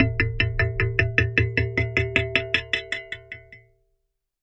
tweezers bounce 1
Tweezers recorded with a contact microphone.